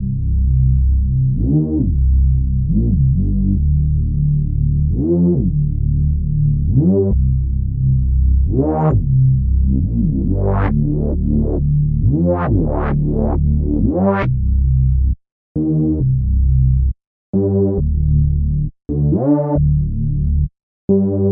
Used Massive. 135 bpm.
it's interesting to know how you used the sound.